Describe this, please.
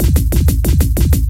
STEREO HORSE
fx, loop